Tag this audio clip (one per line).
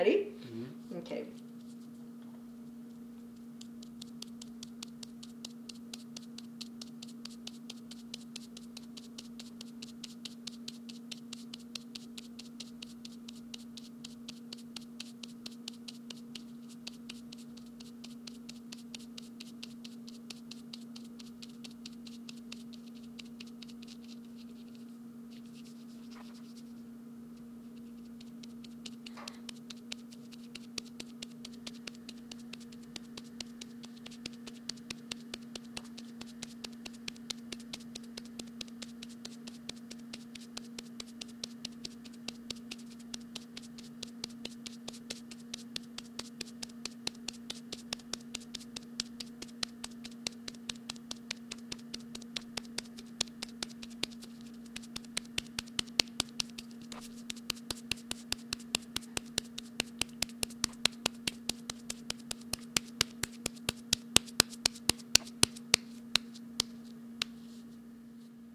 beating-off
masturbating
jerking-off
masturbation